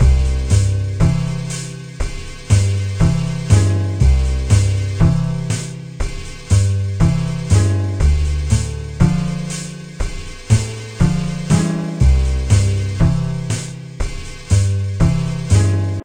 harriaccousticloop120bpm Hello Mix
put that in here and made a nice loop. bass by harri, just search the username
loop, harri, bass, remix, acoustic, chill-out